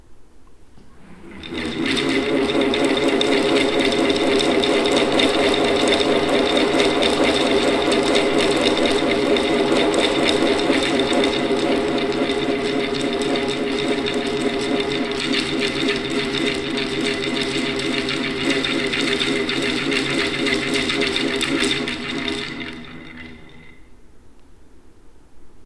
A "Wind Wand" from folk instrument company Lark in the Morning (a kind of bullroarer consisting of a kind of mast supporting several large rubber bands which is swung around the head).